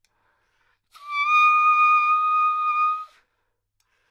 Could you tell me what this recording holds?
Sax Soprano - D#6 - bad-richness bad-timbre
Part of the Good-sounds dataset of monophonic instrumental sounds.
instrument::sax_soprano
note::D#
octave::6
midi note::75
good-sounds-id::5871
Intentionally played as an example of bad-richness bad-timbre
single-note, sax, soprano, multisample, neumann-U87, Dsharp6, good-sounds